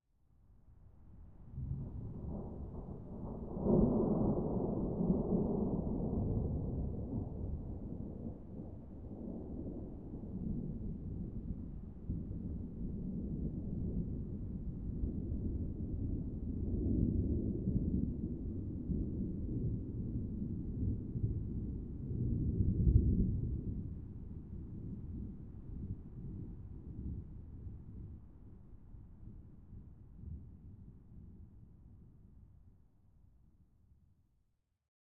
Distant Thunder 1
Deep, rolling thunder. Not very loud. Makes for good storm background noise or as part of a large explosion's reverberation.
A bit low quality and is intended to be played at a low volume. Sounds awesome played at about 8 times the speed.
Recorded with a H4n Pro (built-in mics) 16/10/2019
Edited with Audacity (v2.3.2) 21/10/2021
boom, distant, field-recording, lightning, rain, rumble, storm, thunder, thunderstorm, weather